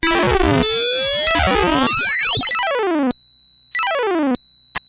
Atari FX 03
Soundeffects recorded from the Atari ST